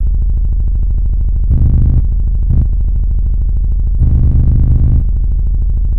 Motor Engine 1
A fake motor engine sound. This one was created by looping a bit of the first 'Base Drum' sample in AudioSauna's "Back to 808s" percussion pack. This was the result.